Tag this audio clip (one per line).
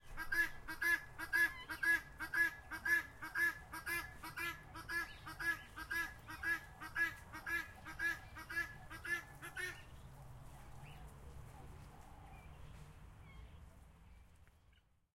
bird-call
field-recording